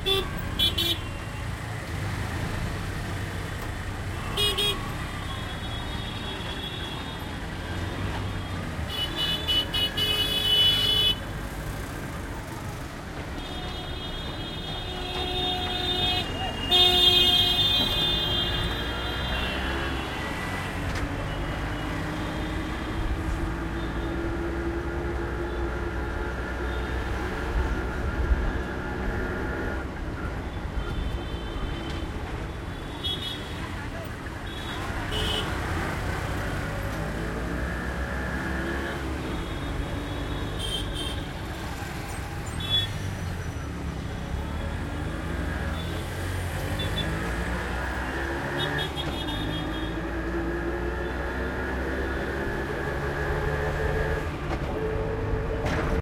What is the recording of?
Autorickshaw ride Mumbai
Sounds recorded from roads of Mumbai.
field-recording, Mumbai, India, road